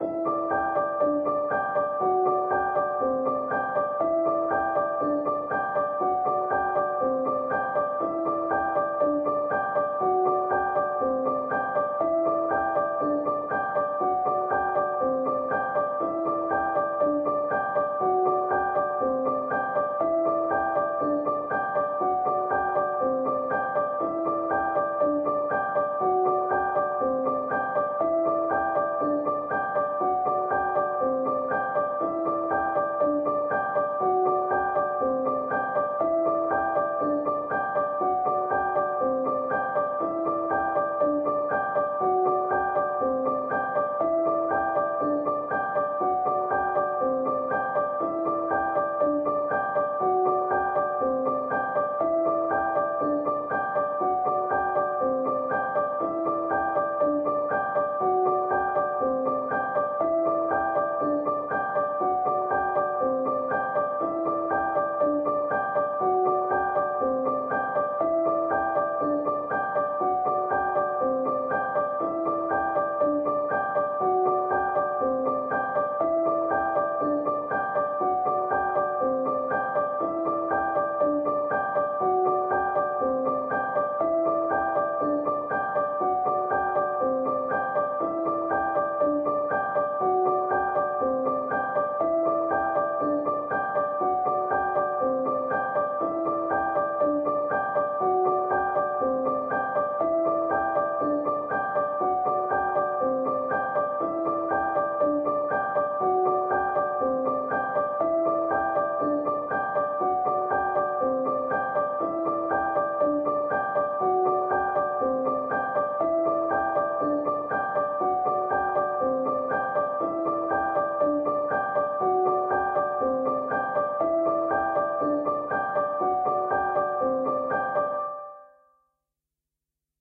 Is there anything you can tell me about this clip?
Piano loops 102 octave long loop 120 bpm
120, 120bpm, bpm, free, loop, music, Piano, pianomusic, reverb, samples, simple, simplesamples